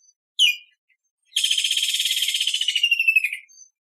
Song of a Woodland Kingfisher. This is a well-known African bird call. Recorded with an Edirol R-09HR.
kingfisher, aviary, tropical, rainforest, exotic, jungle, birds, zoo, bird